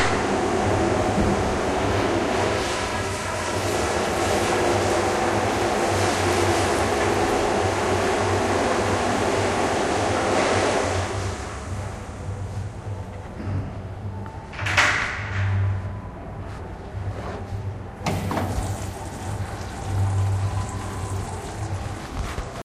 zoo bathroom
Walking through the Miami Metro Zoo with Olympus DS-40 and Sony ECMDS70P. Inside a bathroom.